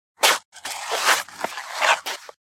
Taken bits and pieces from 189230__starvolt__shuffling-3-front and it sounded oddly like shoveling somehow...AGAIN!
shoveling, shovel, removing